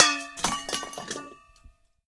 PliersHitBottom-BowlFellDown-SM58held2ftaway
These samples are from the 11 1/2" sized commercial stainless steel mixing bowl.
The bowl was suspended sideways from a single hooked wire, for the smallest deadening of the sound from anything touching it. I ... struck the bottom of the bowl a little too hard, with my 8" needlenose pliers, sending it crashing to the floor which ... resulted in an interesting result.
struckWithPliers, 11-5inchBowl, bowlFell